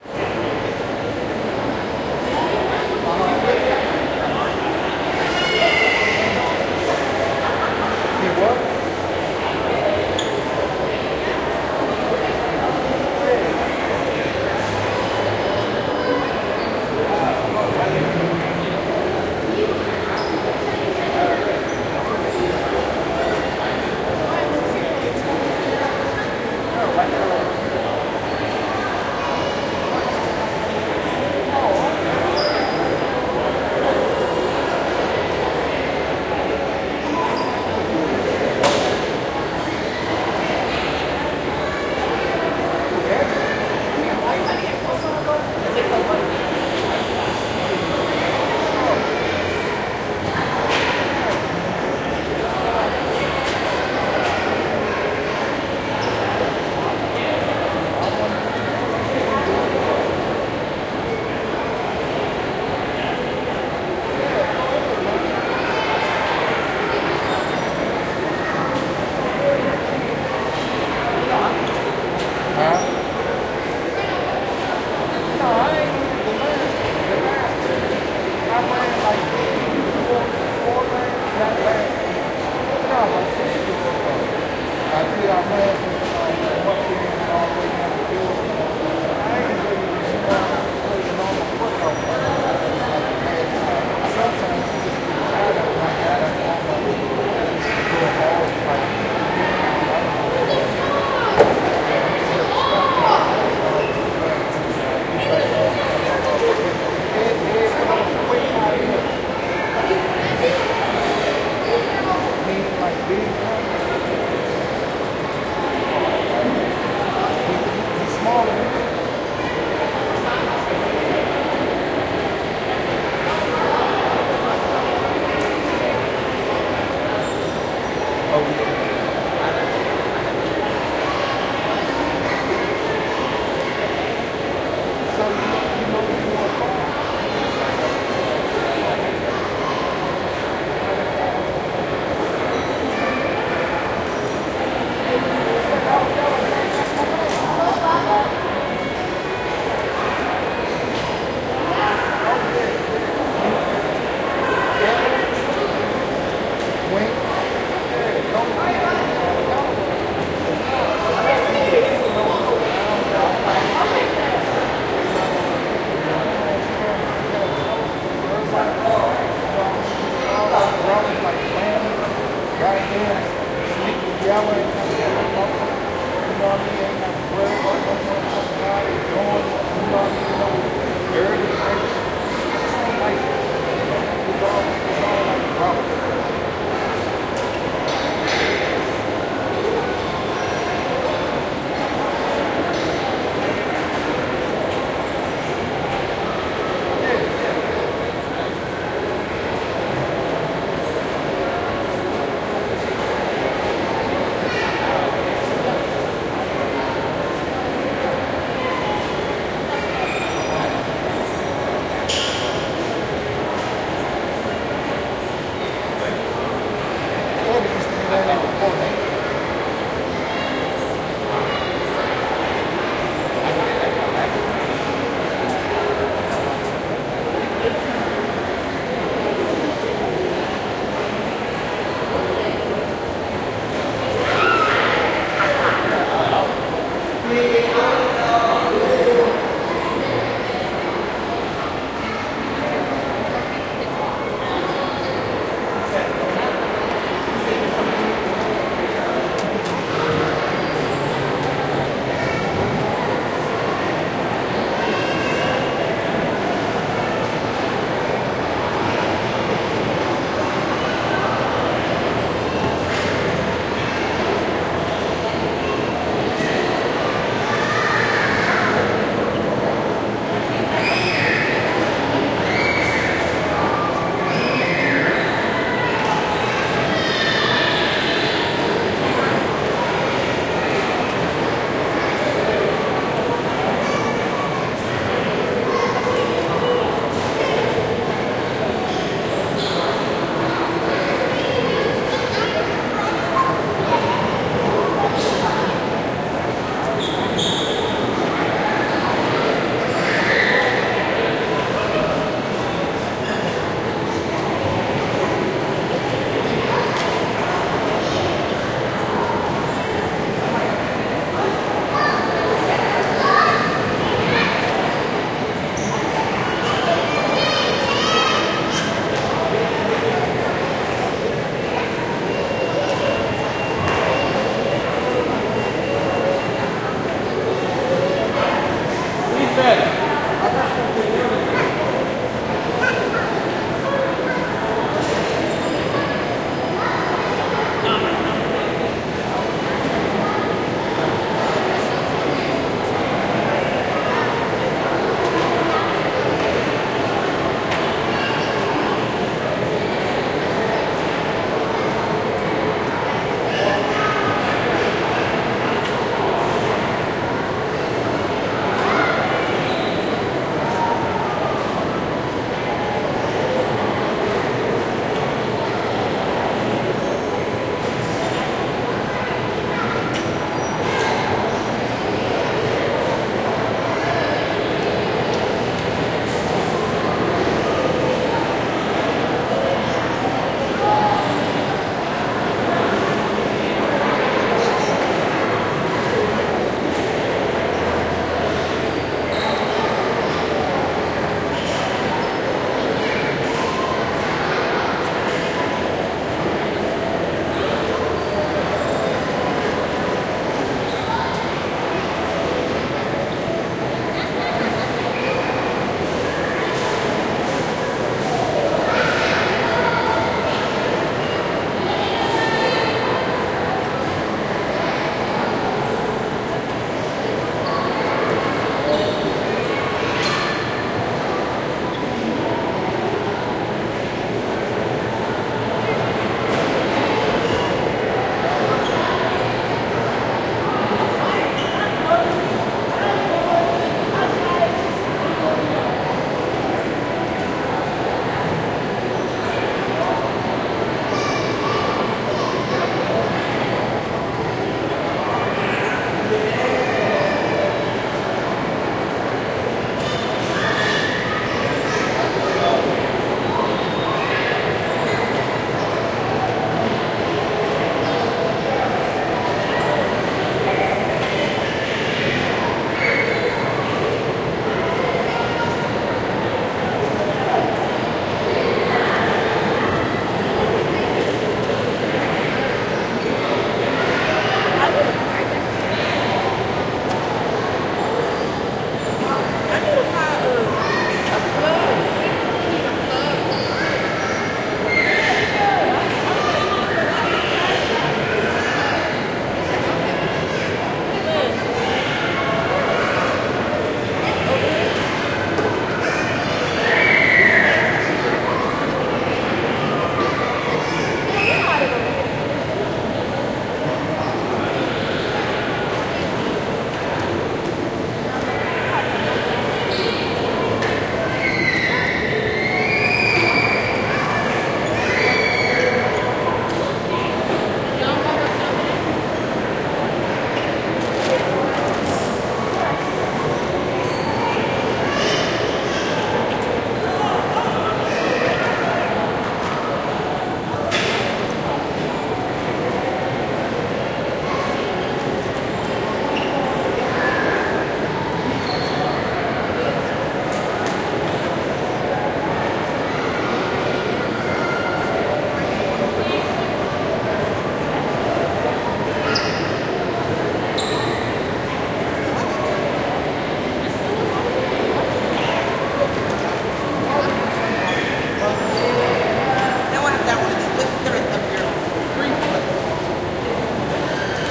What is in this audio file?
Busy American mall full of shoppers and kids.